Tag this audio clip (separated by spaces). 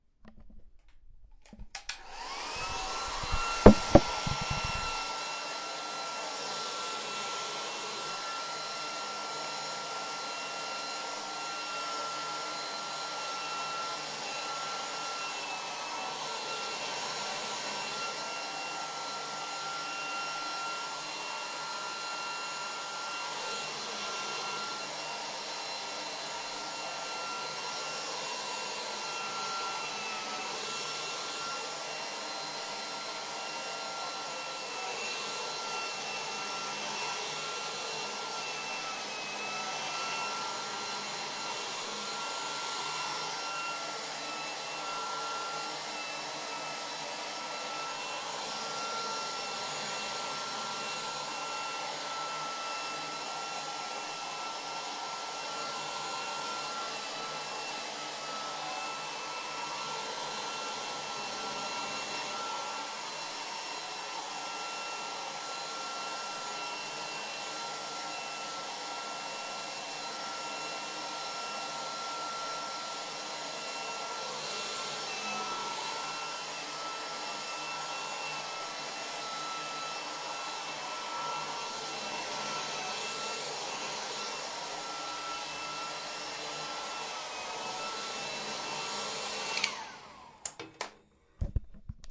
UPF-CS14 air dryer hairdryer swoosh upf wind